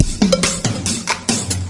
70 bpm drum loop made with Hydrogen
beat, electronic